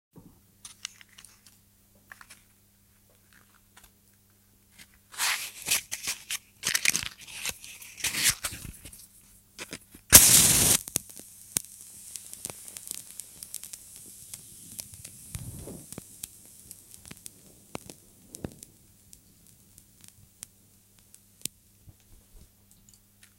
Getting a match out of the box and lighting it.